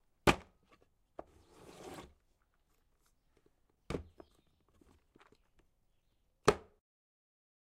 Wooden box on wooden table: wood on wood, slight percussion, wood sliding on wood. Noticeable Impact, slight reverb, slight movement. Recorded with Zoom H4n recorder on an afternoon in Centurion South Africa, and was recorded as part of a Sound Design project for College. A wooden box and a wooden table was used.